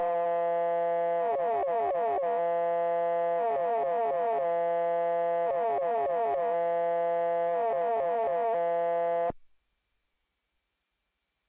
A series of sounds made using my wonderful Korg Monotron. These samples remind me of different science fiction sounds and sounds similar to the genre. I hope you like.
Electronic, Futuristic, Korg, Machine, Monotron, Sci-Fi, Space, Space-Machine